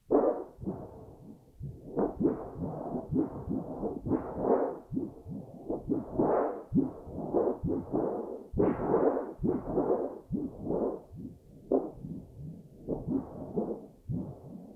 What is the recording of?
The tinman has a heart murmur. I am supposed to be fixing the grill with a piece of sheet metal but when I picked it up I heard the noise and could not resist. All I hear is more hiss. Must be the Samson USB microphone.
heart, metal